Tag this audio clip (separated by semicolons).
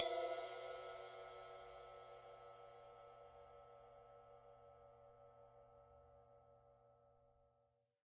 drum; rock; metal; kit; heavy; zildjian; avedis; ride